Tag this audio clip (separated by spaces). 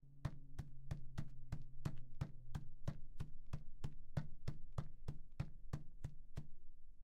Correr steps pasos